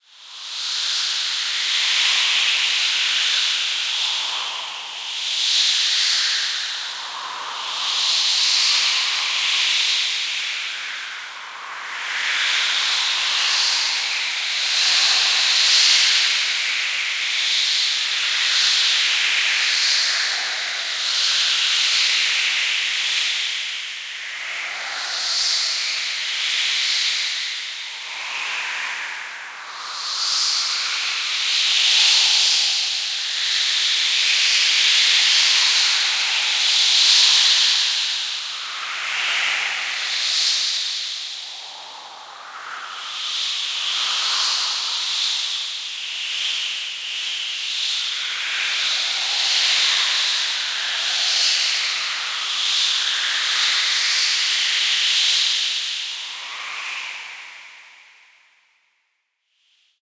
This sample is part of the "Space Drone 3" sample pack. 1minute of pure ambient space drone. Dense wind noises.
space, reaktor, drone